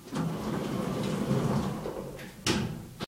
lift doors closing 04
Lift doors sliding shut.
close, closing, doors, elevator, kone, lift, slide